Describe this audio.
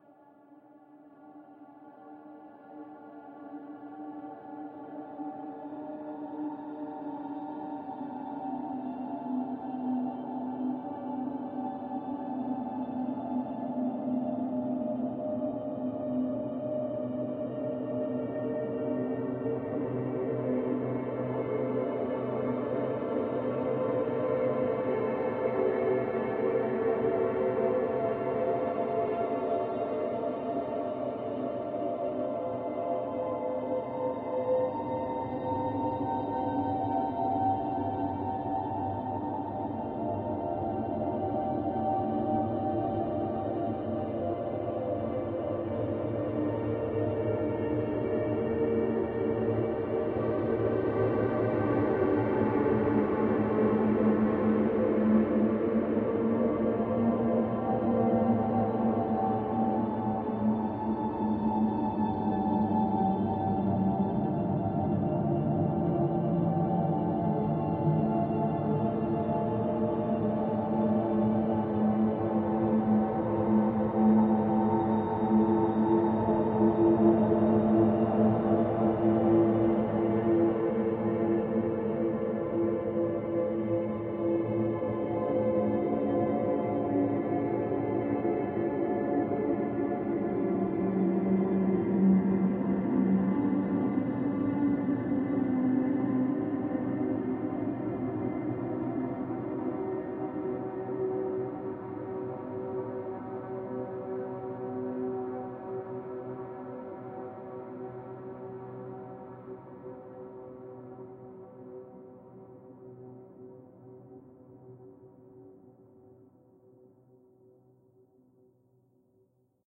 Future Garage (Ambient Textures) 06
Future Garage (Ambient Textures)
Opening/Ending